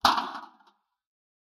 hit box 01
Sound effect for hitting a box with a sword, creating by bumping a plastic bin with the fist and some editing. This is one of two alternating sounds. This sound was recorded with a Sony PCM M-10 and edited for the Global Game Jam 2015.
computer-game, sfx, arcade, video-game, game, effect, box, action, hit